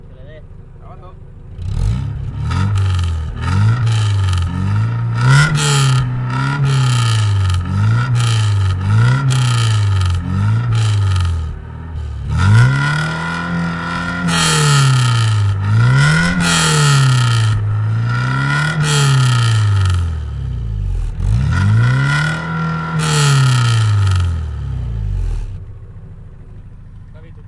Tubo de escape de un coche de deportivo.
exhaust pipe of a race car.
Recorded with my Zoom H4n
escape, car, de, exhaust, pipe, tubo, coche